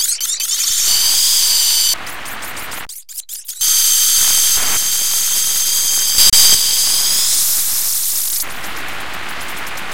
a drone breaking through a squeaky wavering panning frontline; done in Native Instruments Reaktor and Adobe Audition